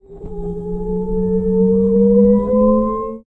A sci fi spaceship speeding up.
Recording Credit (Last Name): Frontera